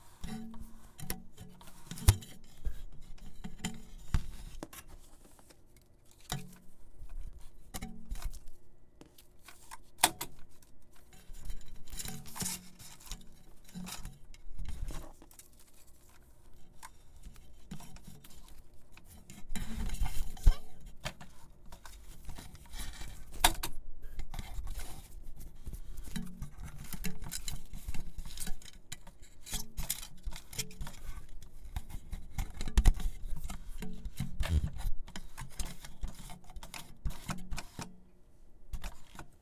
reel to reel tape machine tape and spool handling threading2
handling, machine, reel, spool, tape, thread, threading, up